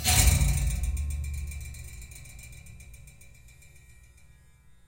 tail perc - tail perc
Electric shaver, metal bar, bass string and metal tank.
engine, Repeating, metal, tank, processing, electric, shaver, metallic, motor